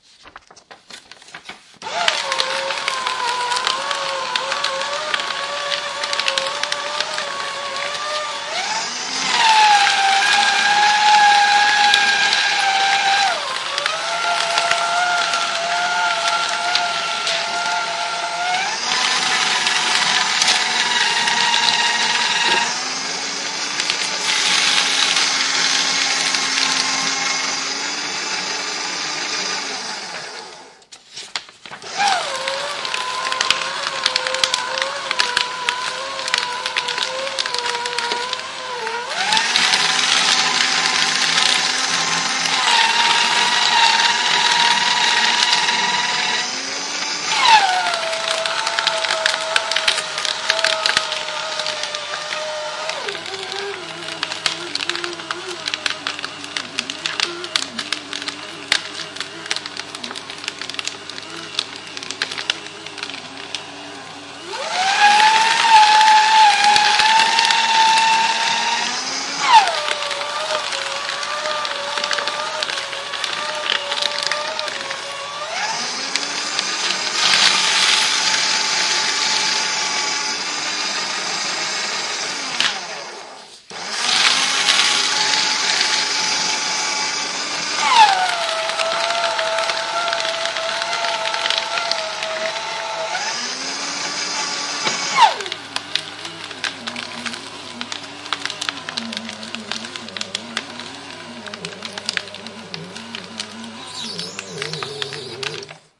This is the sound of a paper shredder dealing with varying amounts of paper until one too many sheets are added.